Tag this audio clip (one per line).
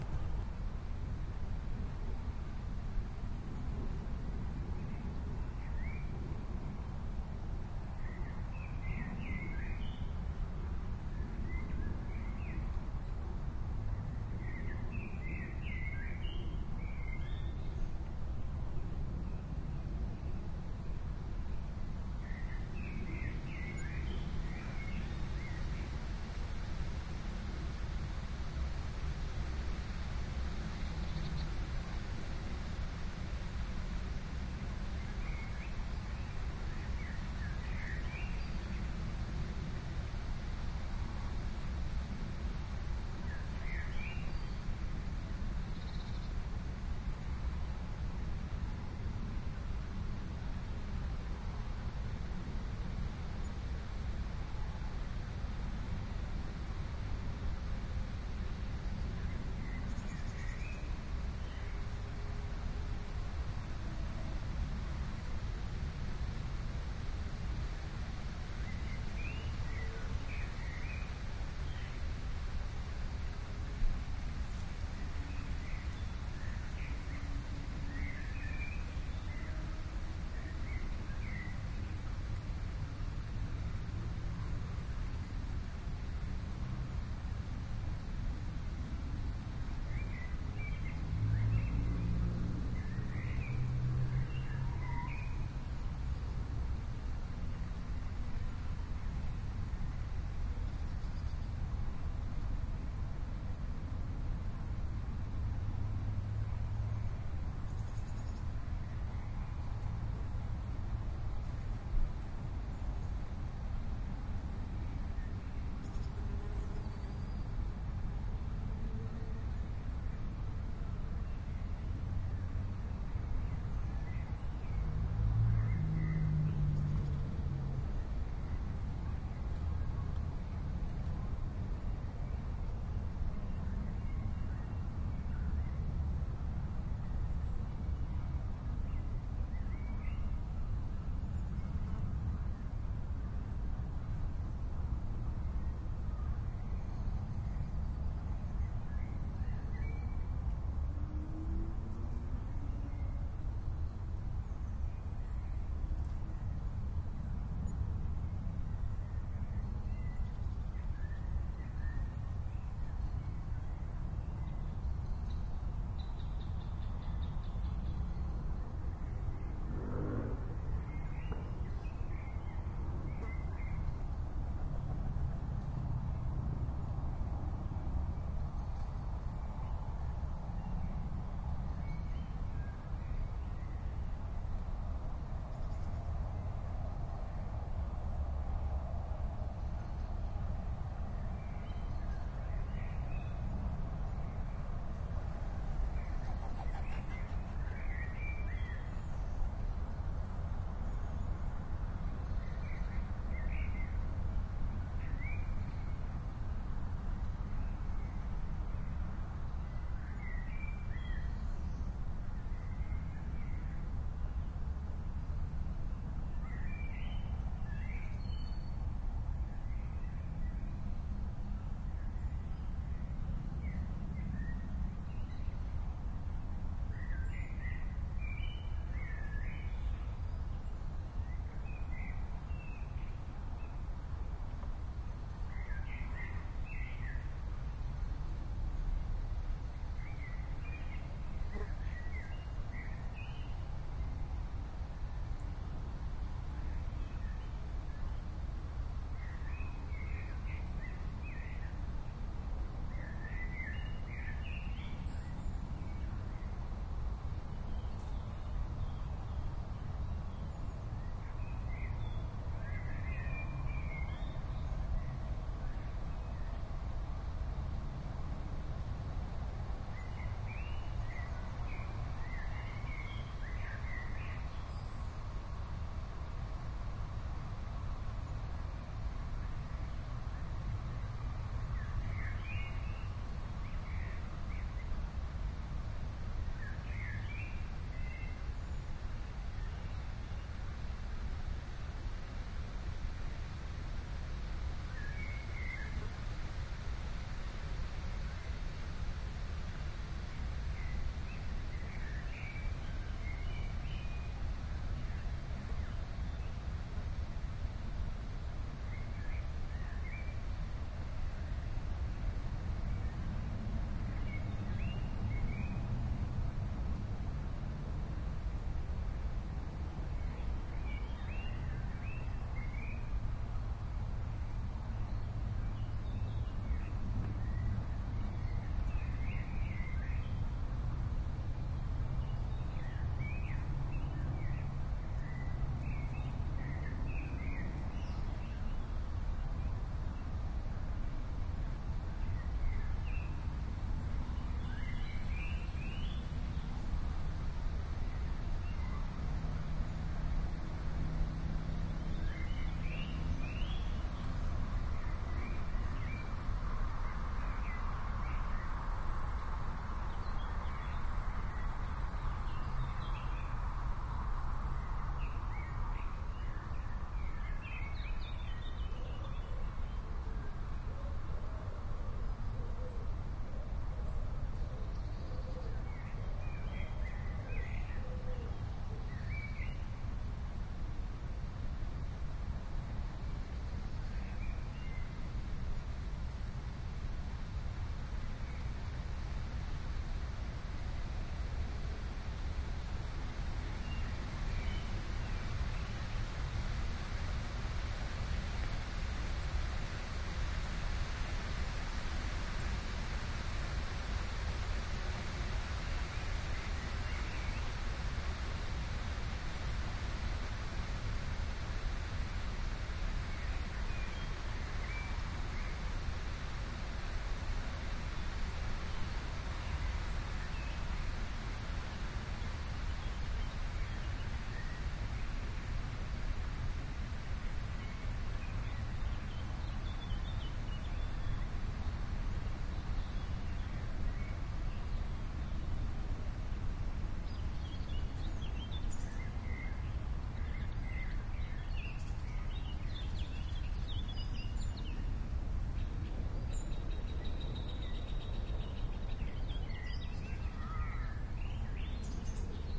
athmos
evening
field-recording
flickr
park